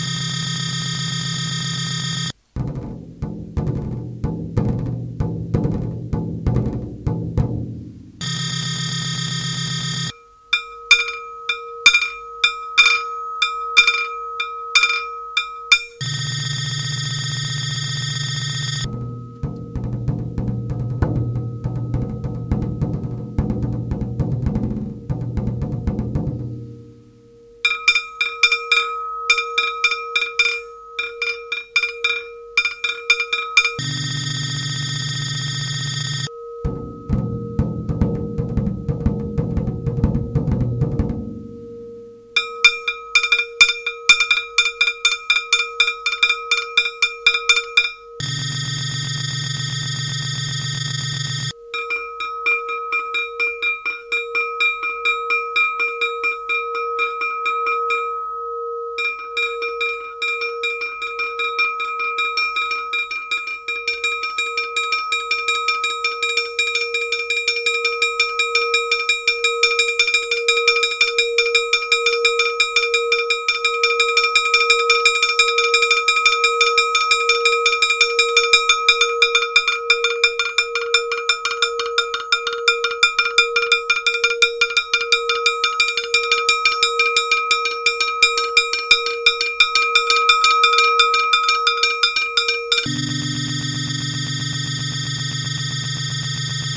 Positive status.
E-drum plus Al-gong. Condensator mic
sense, status, mood, feelings